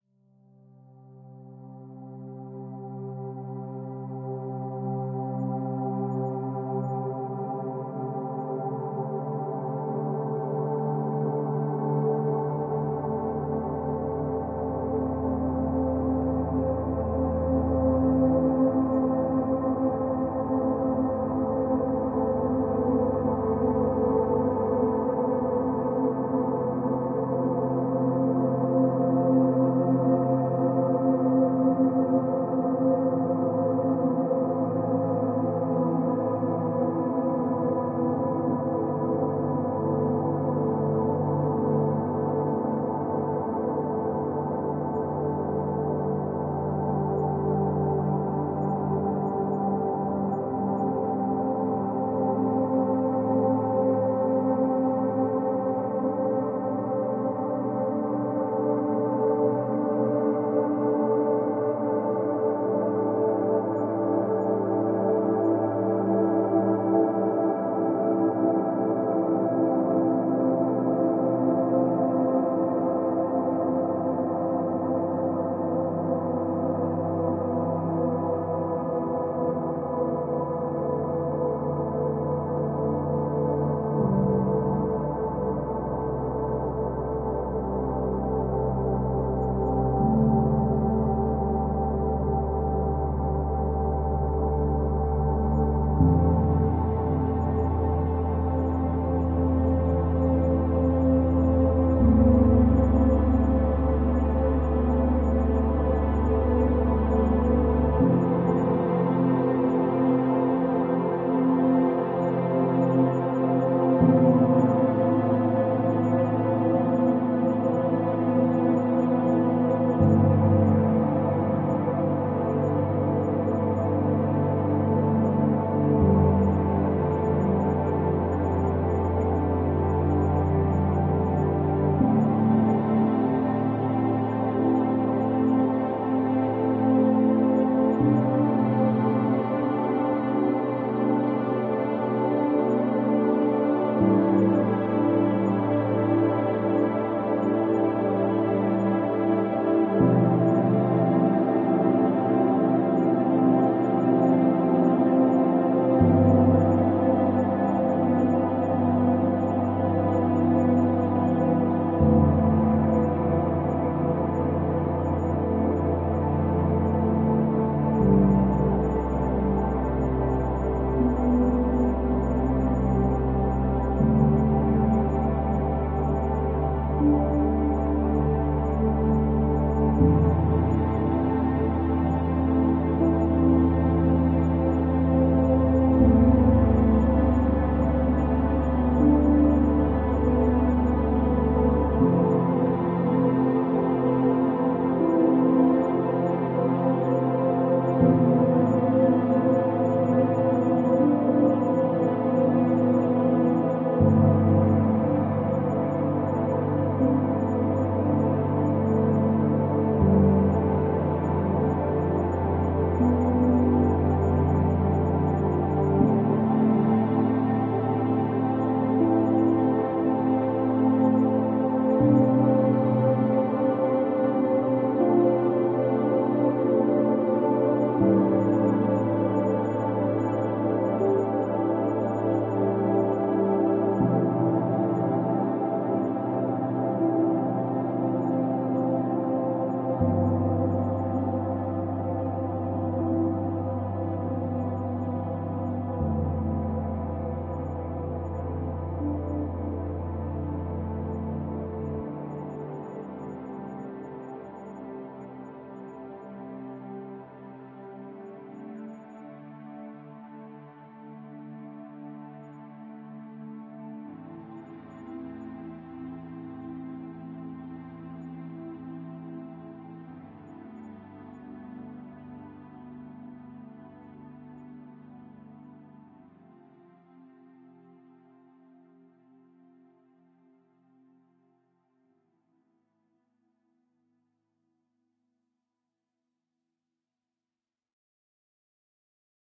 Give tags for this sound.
emotional
electronic
ambience
meditation
soundscape
atmosphere
deep
relax
piano
music
calm
ambient

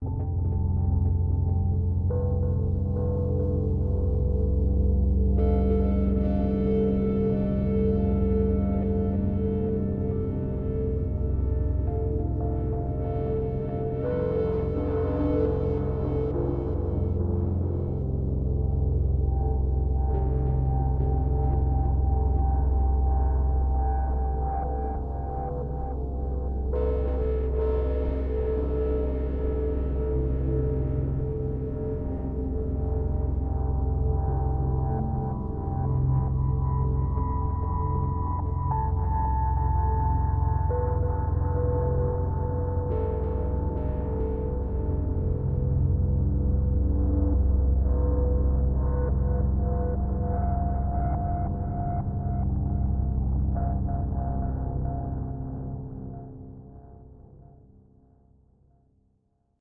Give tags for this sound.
singing
space
music
electronic
native
software
sci-fi
experimental
synth

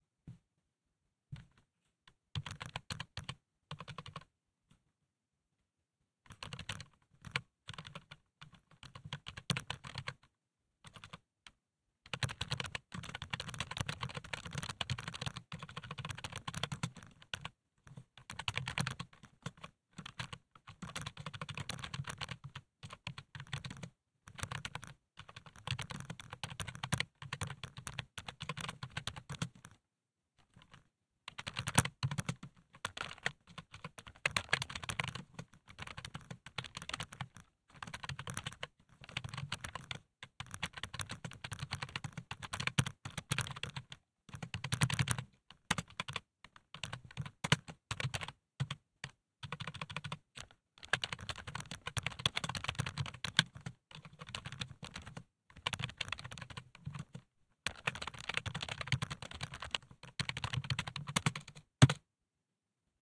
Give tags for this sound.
button
key
keyboard
keystroke
type
typing

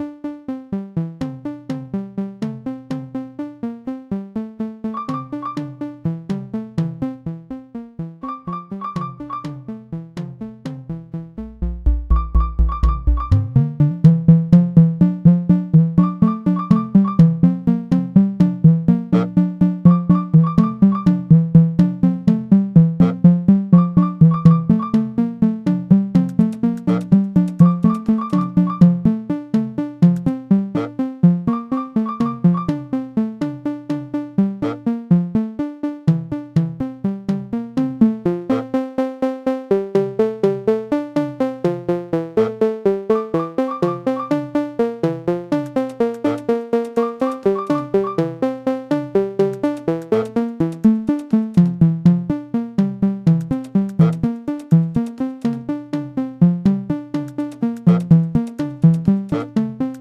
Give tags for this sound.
ambiance
ambience
sound

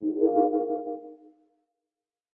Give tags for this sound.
blip
screen
switch
click
UI
cinematic
computer
button
bleep
application
signal
alert
sfx
gadjet
fx
option
keystroke
game
GUI
command
alarm
beep
interface
select
confirm
typing
film
effect
menu

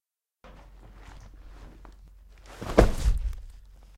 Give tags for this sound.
bag
rucksack

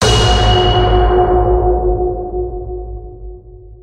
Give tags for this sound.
scary
horror
terrifying
ghost
spooky
creepy

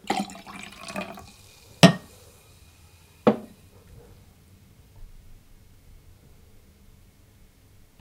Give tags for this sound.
chink
fizz
fizzy
glass
pour
sparkling
water

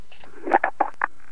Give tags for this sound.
fce
m
n
oolo
s

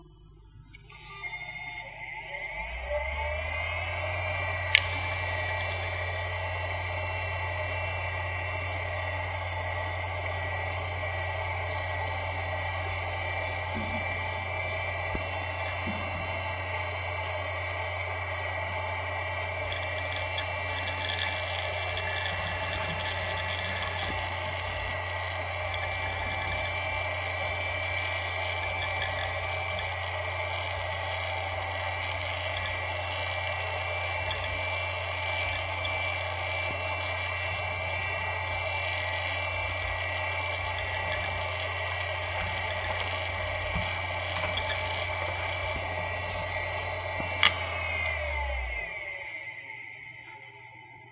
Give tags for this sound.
motor
rattle
hdd
machine
hard
seagate
disk
drive

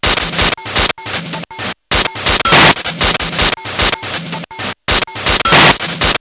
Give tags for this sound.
drums
glitch
me
nanoloop
table

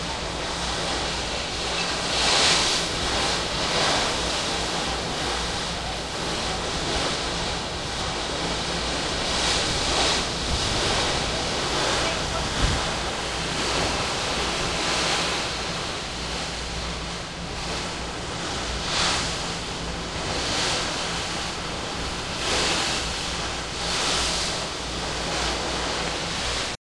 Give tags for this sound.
new-jersey
ocean
cape-may-lewes-ferry
bay
boat
delaware
field-recording

